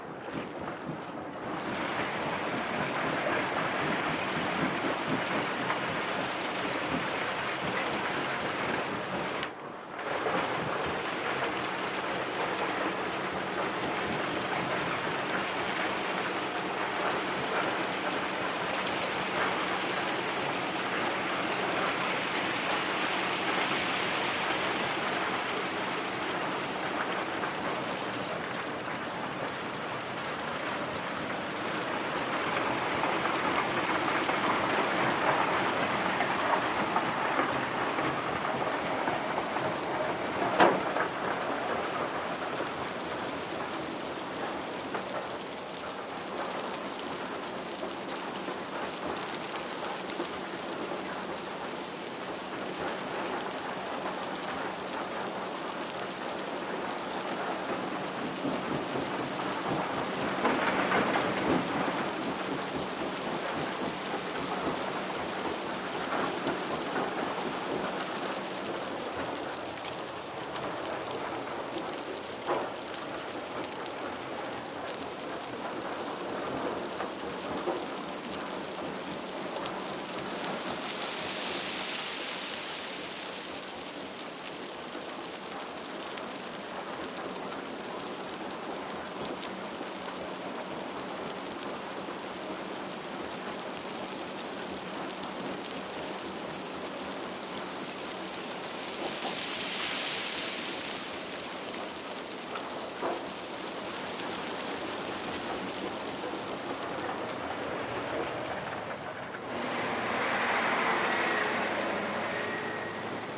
FX - cascada de piedras
stonefall, stone, fall